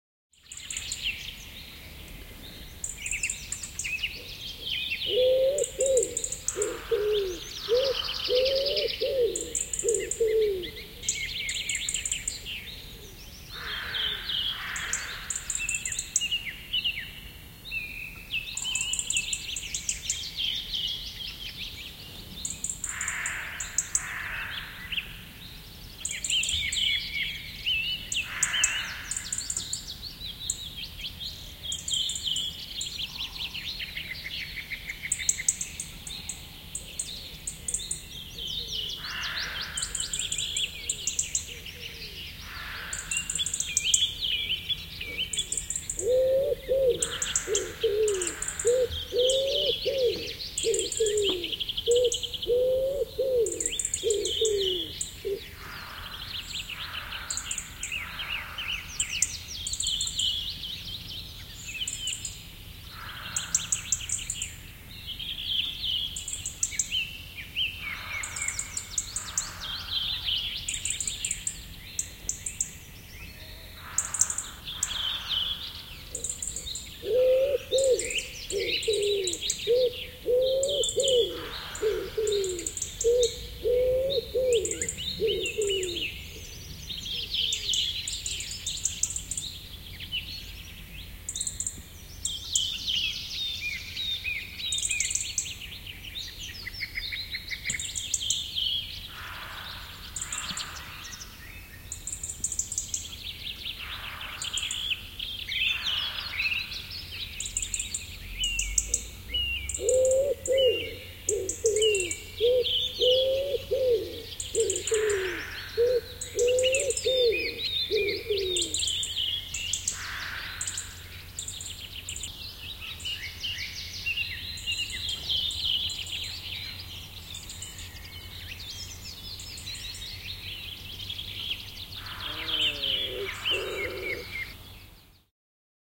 Linnunlaulu, lintuja metsässä, kevät / Birdsong, birds in the forest in the spring, robin ticking, wood pigeon hooting, other birds in the bg
Lintuja havumetsässä, punarinta tiksuttaa, sepelkyyhky huhuilee. Taustalla muita lintuja.
Paikka/Place: Suomi / Finland / Lohja, Karkali
Aika/Date: 08.05.2002
Bird, Birds, Field-Recording, Finland, Finnish-Broadcasting-Company, Forest, Linnunlaulu, Linnut, Lintu, Luonto, Nature, Soundfx, Spring, Suomi, Tehosteet, Wood-pigeon, Yle, Yleisradio